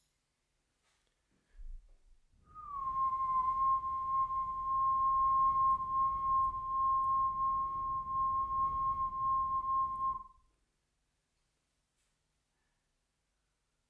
Just ordinary whistle tone u can use it in your sampler and play with it
I would just like to get note how it works for you and hear it of course.But it is up to you.
blow, sample, sampler, sound, whistle, win